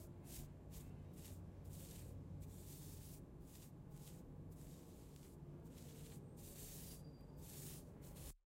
This sound was recorded in a bathroom using a paint brush and water against a wall